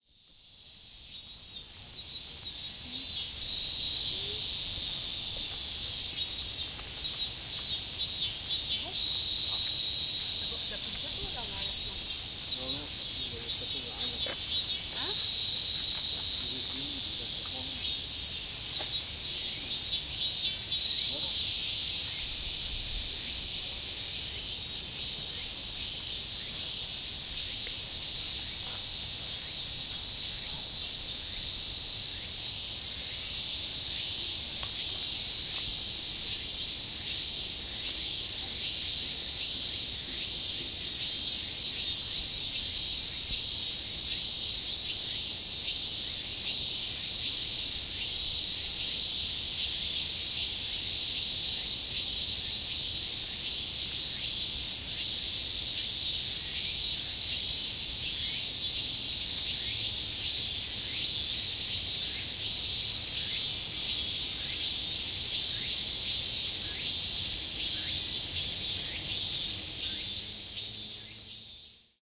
Imperial Palace Garden (part 2) - August 2016
Crickets having some fun in the Imperial Palace Garden. A very hot day!
Recorded with a Zoom H6 in August 2016.
birds, fiel, field-recording, garden, insect, insects, japan, japanese, nature, summer